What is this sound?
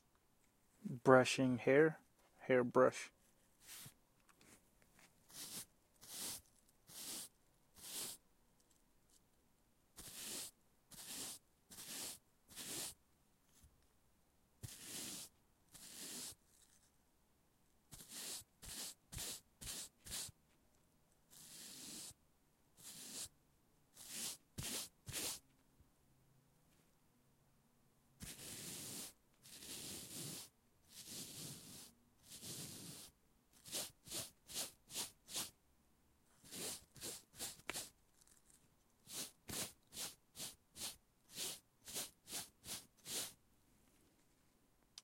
brush hair
brush, brushing, hair